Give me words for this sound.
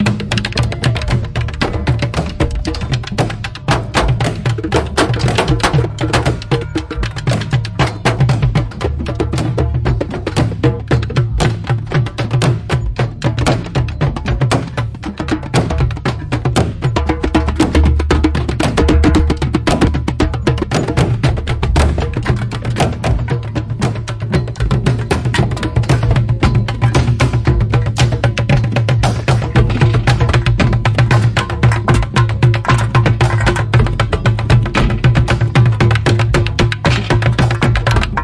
Trash drumming at Sark Roots Festival 2016 (pt5)
Trash drumming at Sark Roots Permaculture Festival 2016.
Recording of a set of interesting recycled objects mounted on scaffolding in the middle of the festival site. Recorded whilst festival was in full swing around the wildly improvising (mostly) amateur drummers on Saturday night
Recorded with a Tascam DR-40 portable recorder. Processing: EQ, C6 multi-band compression and L3 multi-band limiting.